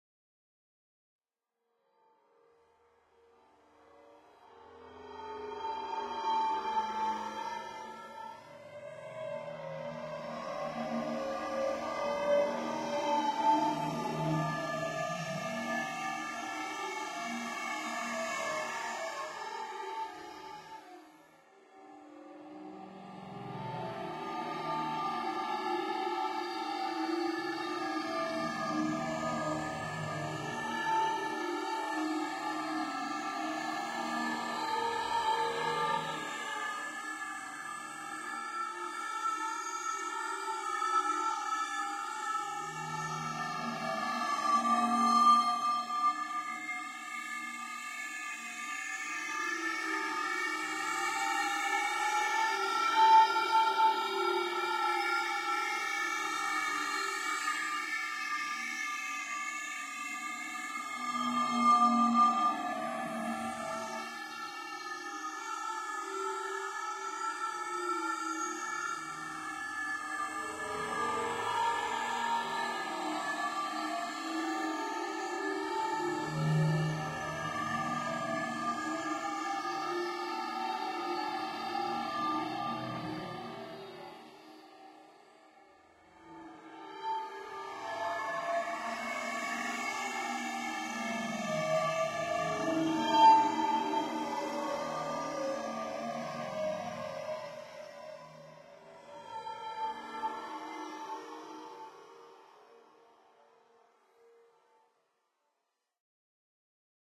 08 chant bunker FINAL (06+07 combo)
Creepy sound design, crazy choirs. seventh step of processing of the bunker singing sample in Ableton. Recorded simultaneously the two previous sample.
abstract, ambiance, effect, freaky, future, fx, High-pitched, horror, reverb, sci-fi, sfx, sound-design, sounddesign, soundeffect, strange, weird